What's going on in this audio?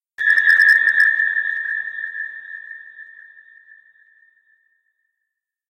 Strange Experimental Sound
So, I was messing around with Audacity and made this little sound. I used a sound of me screaming (trimmed) and I messed up with the effects then KAPOW! It's here! XD
I really had no idea what is this but let's say it was some sort of laser though.
Created with Audacity.
strange, abstract, futuristic, cosmic, effect, sound, laser